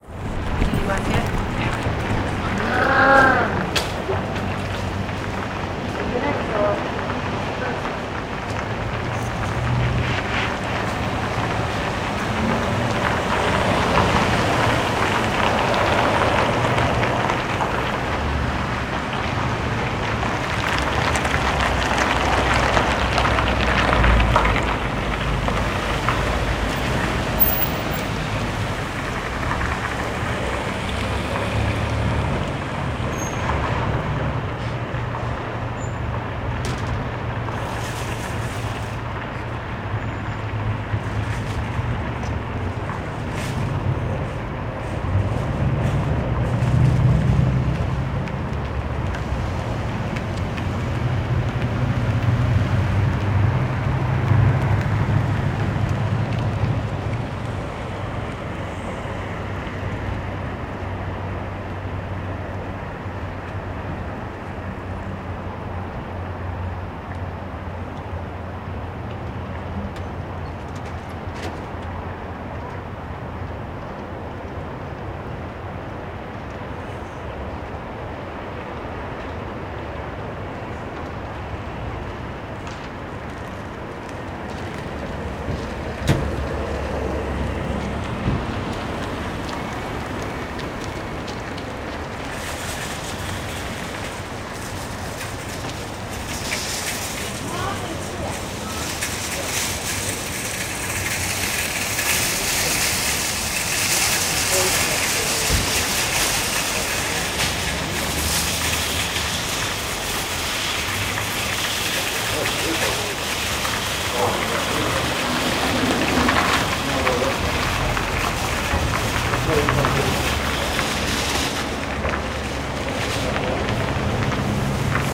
I recorded a parking lot during the holiday timeframe. Cars passing, traffic, crowds, etc.